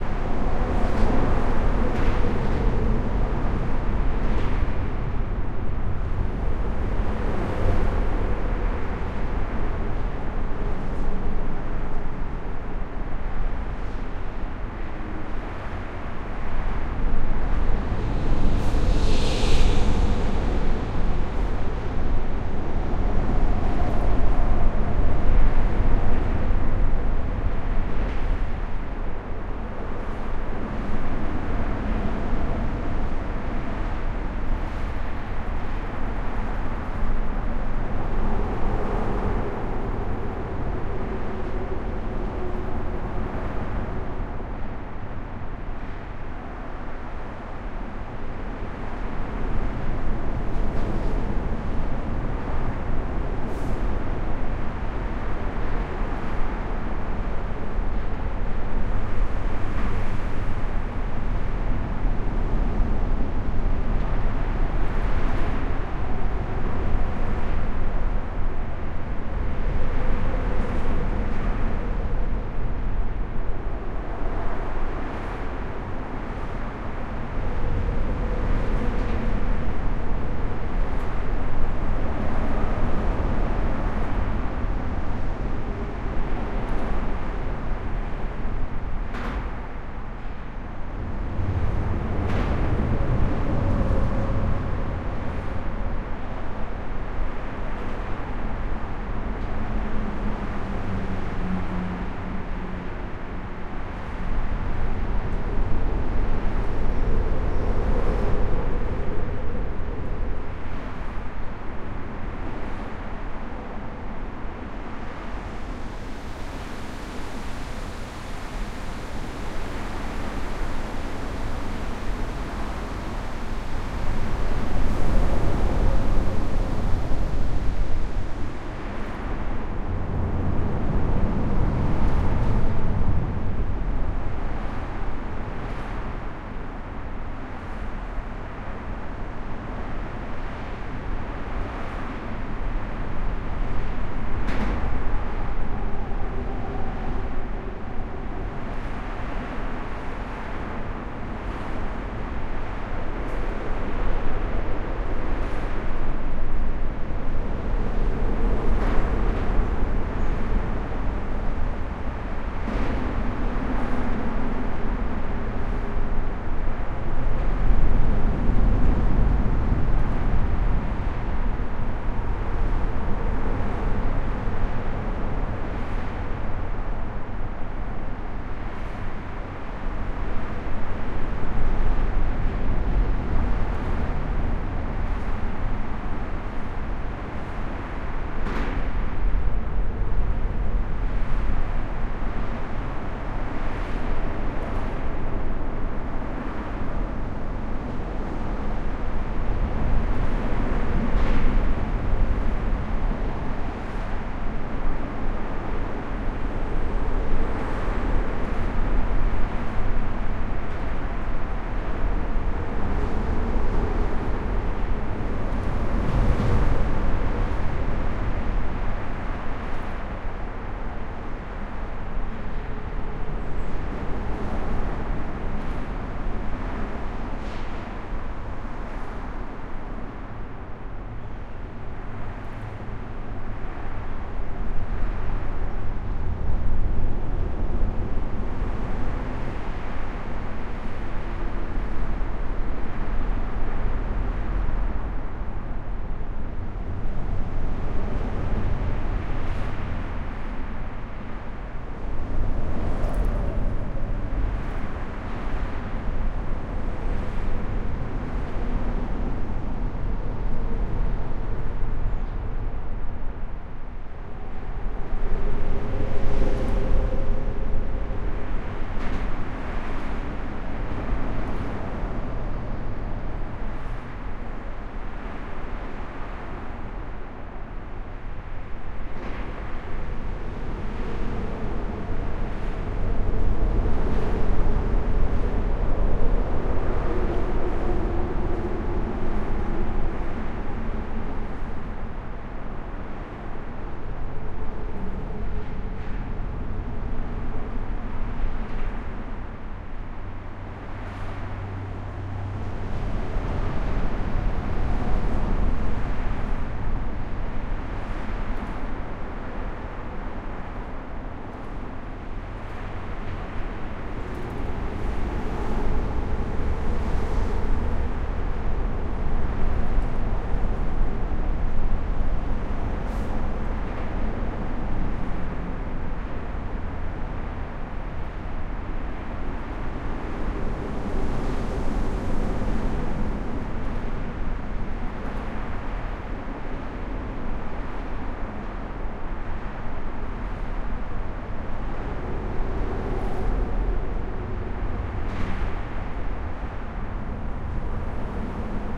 A recording made underneath a very busy highway overpass.